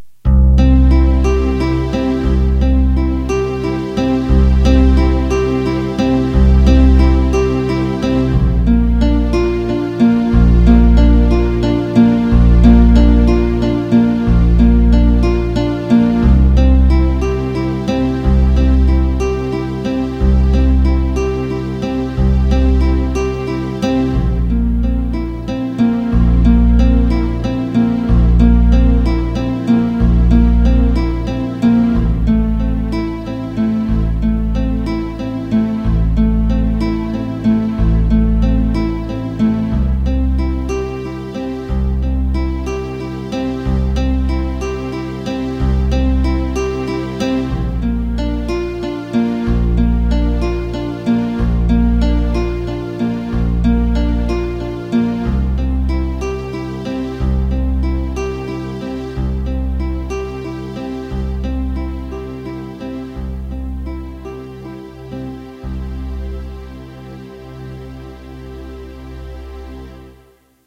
The -After Breaking Up on Park Bridge- Theme
When someone breaks up with you - it's a moment you remember. You not only remember what was said but you remember where it was done. This theme reminds me of the "gathering of thoughts" after a breakup atop a park bridge overlooking the park pond.
Recorded with a Yamaha keyboard using Classic Guitar and Audacity.
alone, breakup, depressing, despair, divorce, lost, sad, worthless